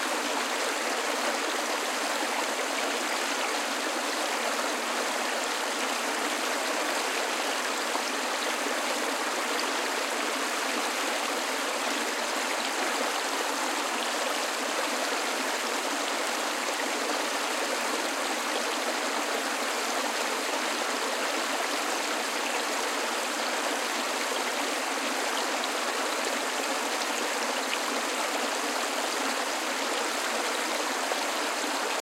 small river sound